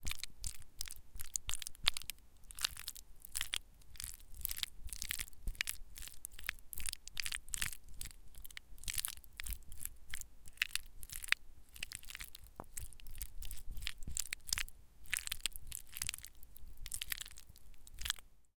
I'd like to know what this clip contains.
noodles - stir 02
Stirring noodles in a ceramic bowl with a metal spoon.
metal-spoon, ceramic-bowl, noodle, spoon, bowl, noodles, food